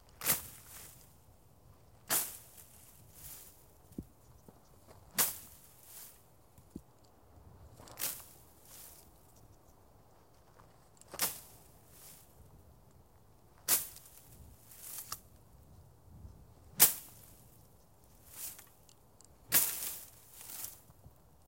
Hitting Tall Grass
Hitting tall dry grass with a stick. Might be useful for things falling on dry grass.
fall, feet, foot, footsteps, walk, walking